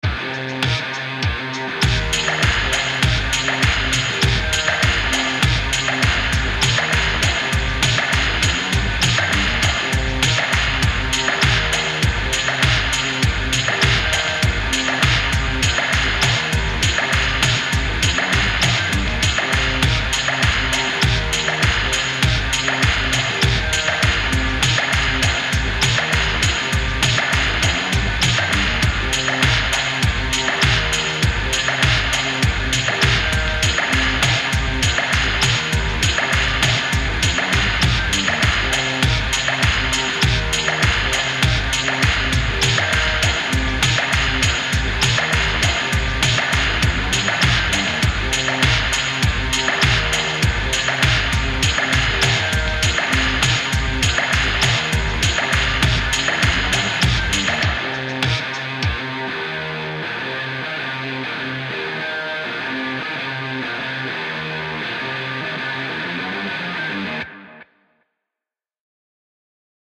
i made this with garage band 2 and i think it's cool rock music hope you in yoy.p.s. more coming soon